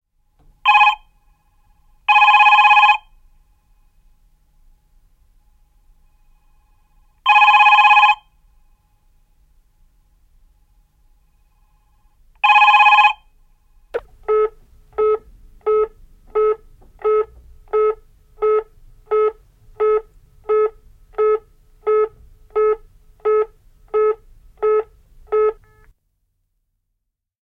Digitaalinen pöytäpuhelin soi. Varattu ääni linjalta.
Äänitetty / Rec: Analoginen nauha / Analog tape
Paikka/Place: Yle / Finland / Tehostearkisto, studio / Soundfx archive studio
Aika/Date: 1989